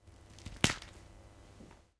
A lot of sound design effect sounds, like for breaking bones and stuff, are made from 'vegetable' recordings. Two Behringer B-1 mics -> 35% panning.
pulse, foley, cauliflower, bones, breaking, vegetable, horror